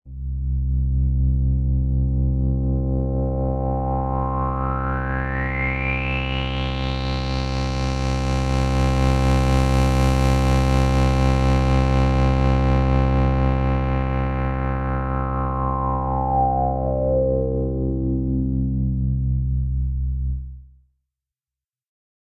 ANALOG DRONE 1
A drone sound i created using a DSI Mopho, recorded in Logic. I didn't use any fx.
It's a filter sweep with some resonance and LFO 1 is modulating PAN position.
ambient, analog, drone, DSI, electronic, filter-sweep, LFO, mopho, subtractive, synth